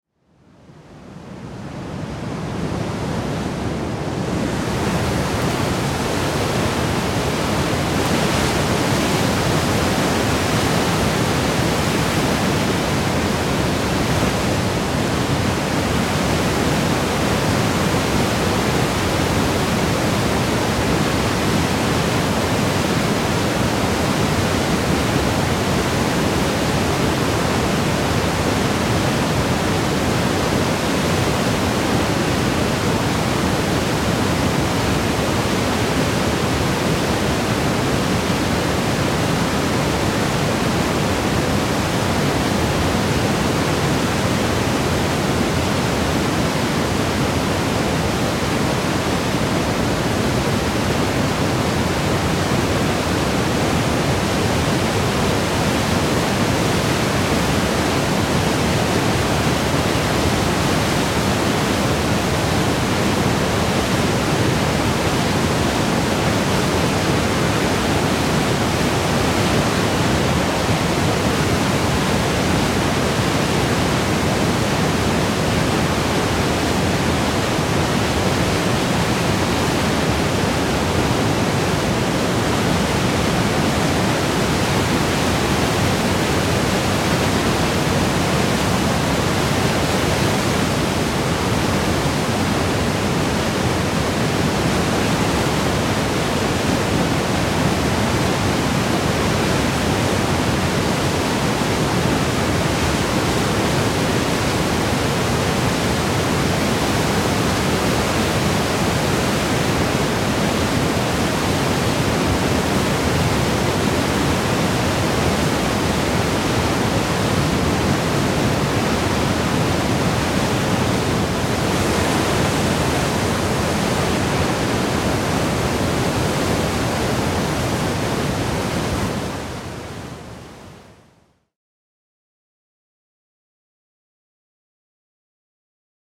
Recorded in Iceland.
Recorder: TASCAM
Microphone: Senheiser